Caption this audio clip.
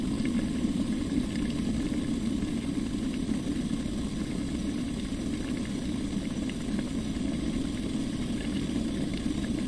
Kettle bubbling, no whistle
Recorded with a Zoom H5, x/y mic. EQ'd in FL Studio
Zoom, appliance, H5, household, home, domestic